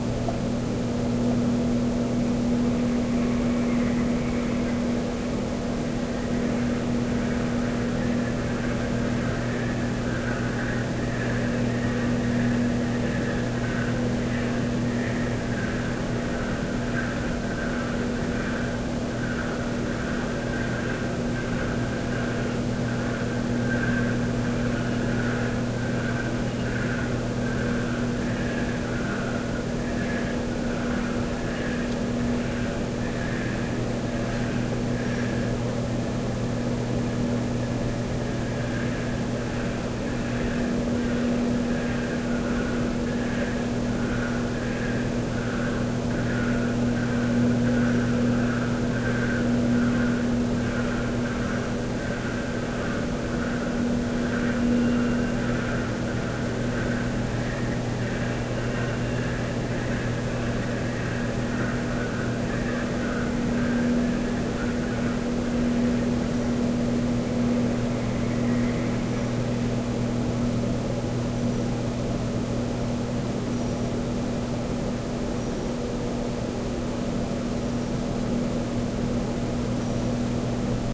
creepy breathing
This is a very quick and dirty lo-fi recording of a very unsettling noise I am currently hearing outside of my 2nd story bedroom window.
I have no clue what it could be. I have lived here for over a decade and never heard this sound. It's like wind, but it keeps fading in and disappearing again. When it starts, it sounding like very rapid, almost whistling, shallow breathing.
I can't imagine what is making this sound, but it's pretty unnerving, and I'm too creeped out to open the curtains.
I'm hoping someone can recognize this and tell me what this is because I'm starting to get very uncomfortable after about 10 minutes of hearing this on and off again.
I am attempting to record it as it happens, so I've got several recorded instances of this if you want to hear more of it.
breathing, creepy, evp, ghost, ghostly, lo-fi, spooky, whistling, wind